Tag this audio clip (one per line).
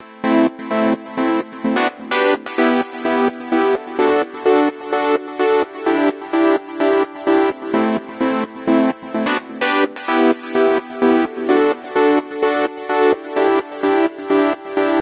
pop
sequenced